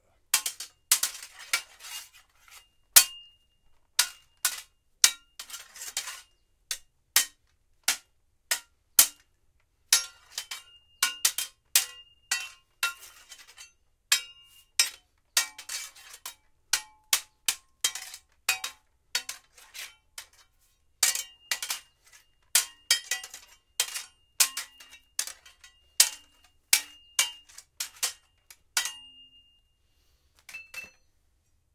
Kitana vs big crobar
Me clashing a Kitana sword on a really big crowbar.
Might sound a bit like some kind of fight.
clash, crobar, fight, Kitana, sword